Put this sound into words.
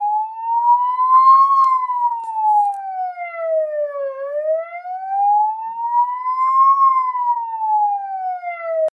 sonido ambulancia grabado en calle
19, calle